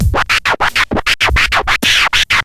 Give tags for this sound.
scratch riff vinyl looped cutting noise beat phrase loopable dj groove cut hiphop rhythmic record chopping turntable hook funky rhythm turntablism chop funk loop

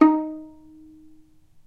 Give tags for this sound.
non-vibrato pizzicato violin

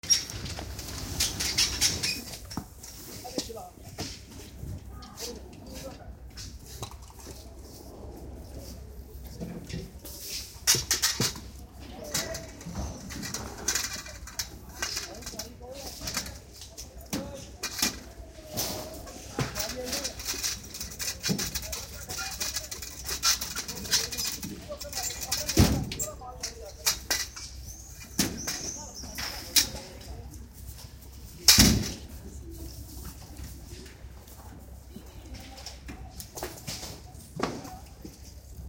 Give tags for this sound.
chatter h24 outdoor soundscape

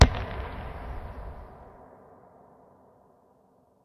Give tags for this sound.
blast distant fire cannon gun weapon

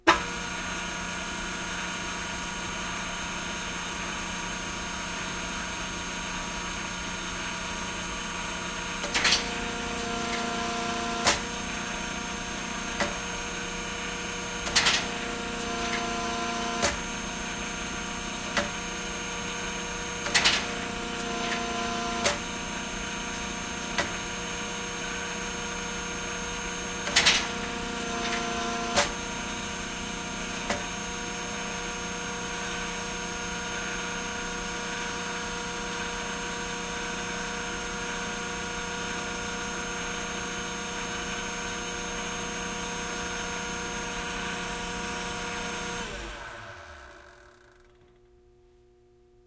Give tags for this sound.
hydraulic machine metal cutter

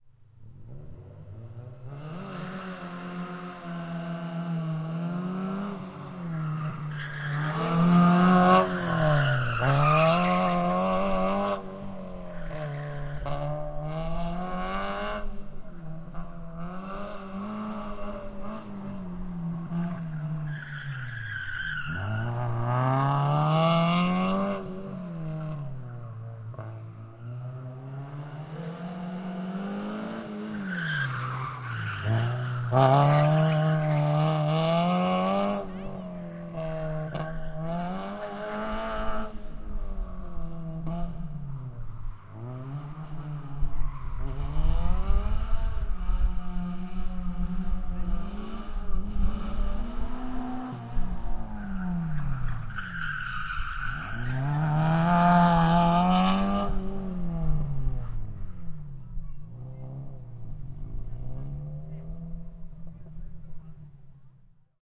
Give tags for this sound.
tires race screeching citroen car

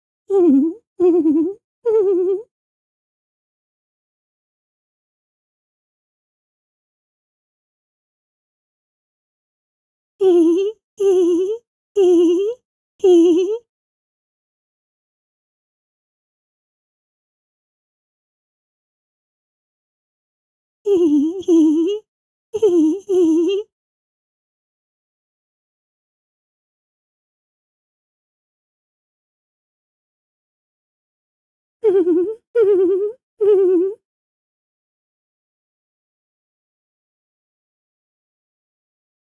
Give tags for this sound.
child girl giggle giggles voice laugh female cartoon giggling woman

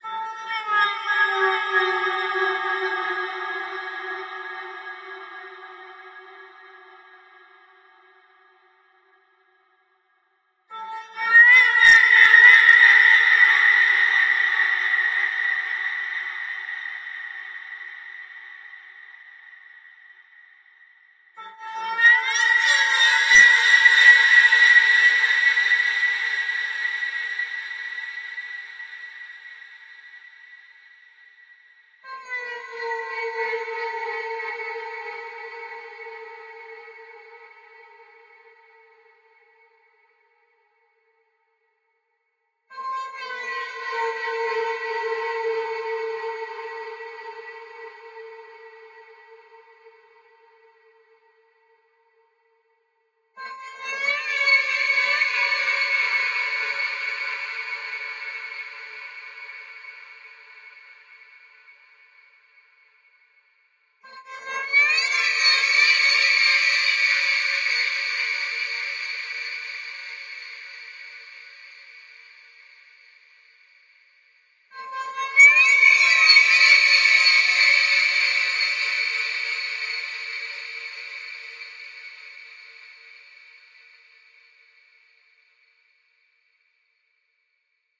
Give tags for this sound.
crying creepy fear scary space drone alien horror Ethereal dark ambient

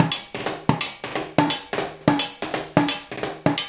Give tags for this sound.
acoustic beat 130-bpm bottle fast container breakbeat percs food improvised metal industrial dance loops drum-loop hoover beats cleaner ambient music loop groovy hard garbage drums break percussion

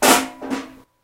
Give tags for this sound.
hit steel noise

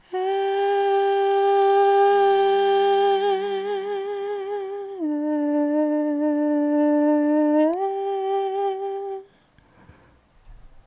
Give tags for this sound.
female long sing vocal voice

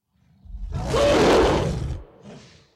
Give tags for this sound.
growl monster creature snarl roar

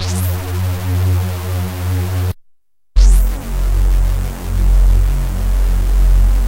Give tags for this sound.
processed bass

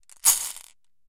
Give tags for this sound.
ceramic ceramic-bowl marble glass-marbles glass shaken bowl shaking shake marbles

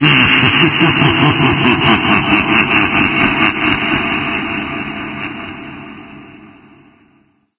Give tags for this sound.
clowny laugh

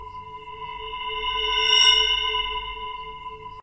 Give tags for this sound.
bells house

